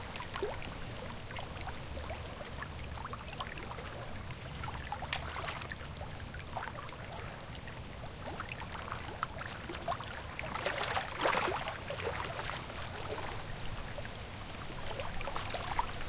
light waves (w/ birds and wind)
Took this several months ago while I was on a walk but never uploaded it... Until now! Hope you like this relaxing - but short - sound effect.
beach, beautiful, birds, field-recording, lake, nature, ocean, sea, seaside, shore, water, wave, waves, wind